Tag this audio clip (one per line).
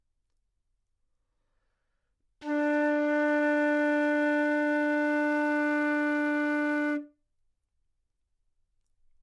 D4
flute
good-sounds
multisample
neumann-U87
single-note